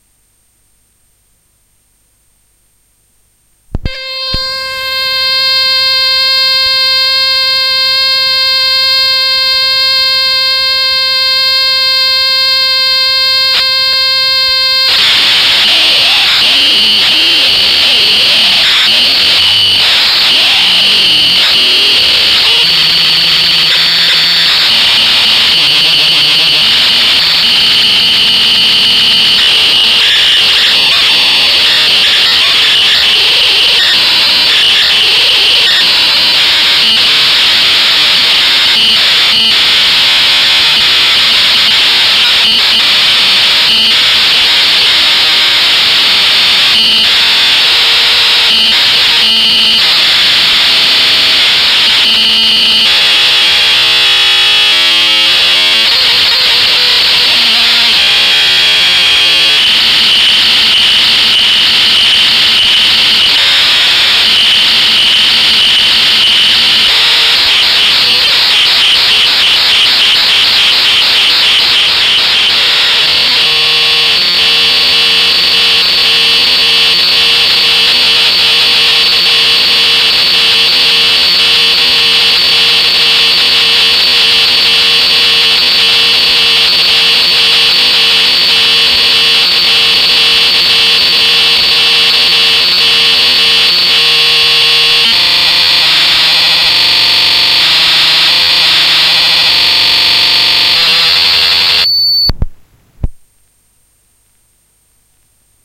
Part of a Commodore 64 cassette played on a deck and recorded through the line-in. Contents unknown.